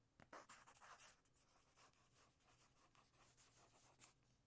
Writing with a pencil on a piece of paper.